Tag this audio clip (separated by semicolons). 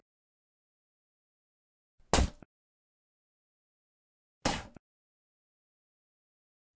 bathroom; CZ; Czech; Panska; spit